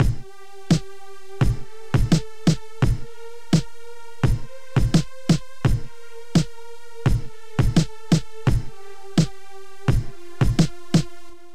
decent beat
Sad music, hip-hop beat.
beat, decent, drum, Hip-Hop, kick, music, rap